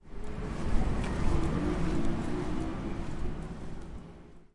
Freddy Guerra C - Auto pasando - Recorrido 2 Mirador UAN Circunvalar
Registro de objeto sonoro (Reloj de pulso) con el equipo MAS-UAN en el mirador de la Universidad Antonio Nariño sede circunvalar (Bogotá - Colombia). Realizado el 7 de mayo de 2019 a las 7:00 pm. Sonidos capturados con una grabadora portátil Zoom H2 por Freddy Guerra C.